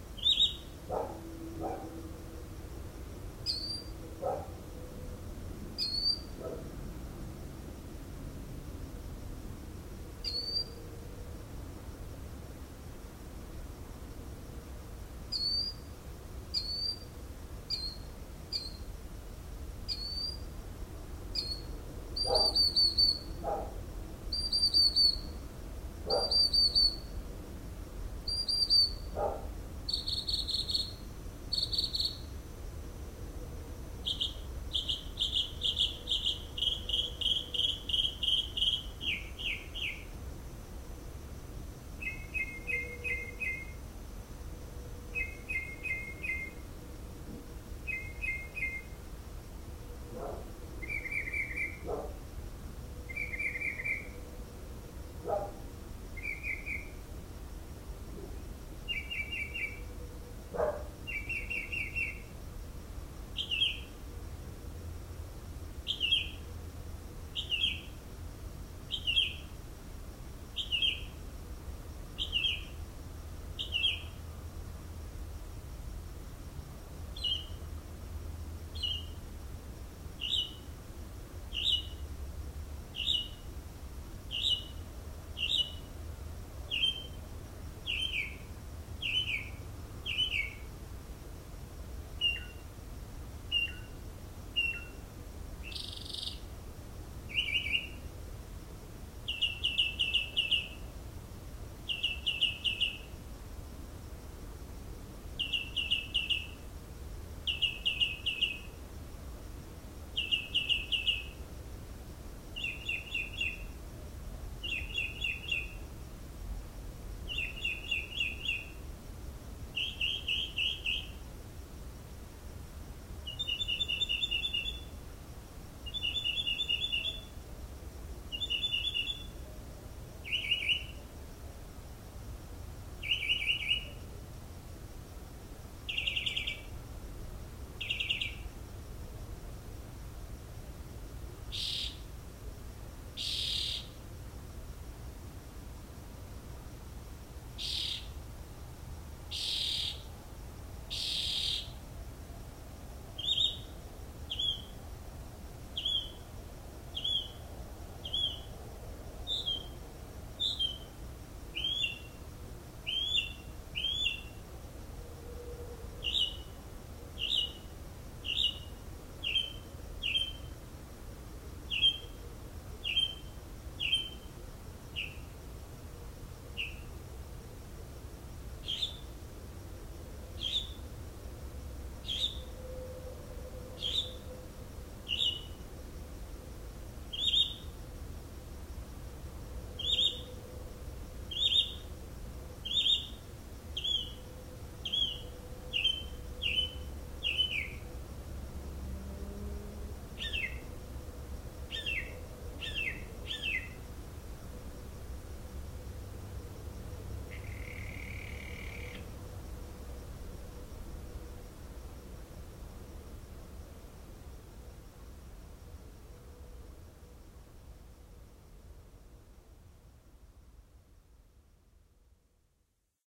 bird at night 01
once, right outside of my bedroom window, in the middle of a night, a bird started making some fascinating sounds. this is one short recording of it. if You'd happen to know what bird is it, please share :)
barking, bird, chirps, dog, hi-pitch, night, short, singing, variety